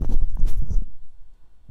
Mic noise
An NT5 being fumbled with.
noise, fumble